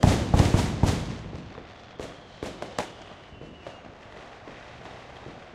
delphis FIREWORKS LOOP 10 ST
Fireworks recording at Delphi's home. Outside the house in the backgarden. Recording with the Studio Projects Microphone S4 into Steinberg Cubase 4.1 (stereo XY) using the vst3 plugins Gate, Compressor and Limiter. Loop made with Steinberg WaveLab 6.1 no special plugins where used.